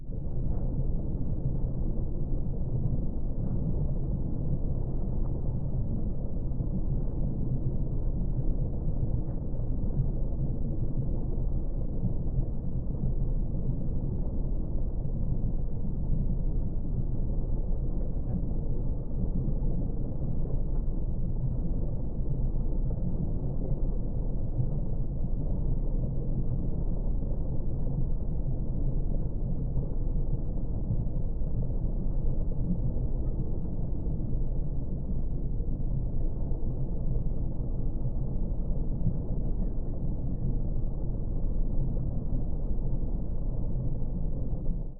Underwater Ambiance.
Gears: Zoom H2N